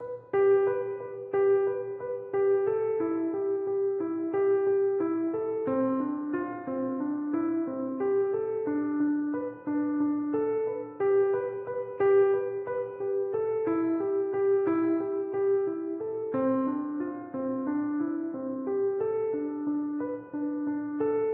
ableton piano sampler